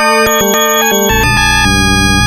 circuitbent Yamaha PSR-12 loop7
circuit, sample, yamaha